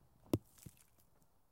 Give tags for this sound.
bullet impact pebble hit rock stone dirt pebbles

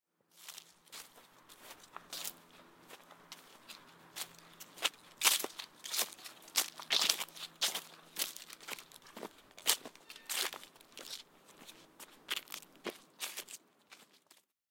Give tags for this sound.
CZ Czech footstep footsteps leaves man Pansk Panska step steps walk walking

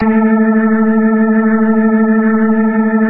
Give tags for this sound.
organ sound

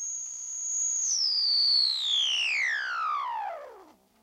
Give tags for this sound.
korg Lazer Monotron Sample sampler sfx sound synth

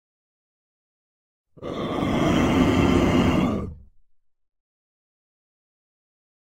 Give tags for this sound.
Creature Growl Horror Monster Roar

